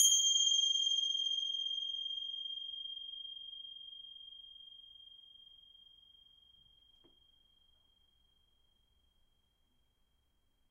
Bell Glocke
a ritual bell from Osaka, Japan. used in religious moments like burials .
Bell, buddhism, temple